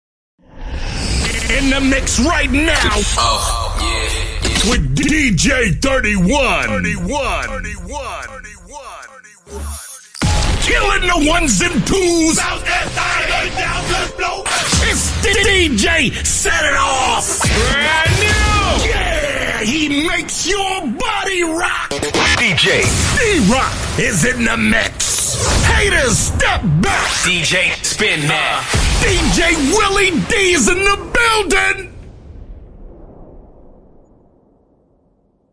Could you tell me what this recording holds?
MANNY DJ DROP DEMO
DJ, Drops, Mixtape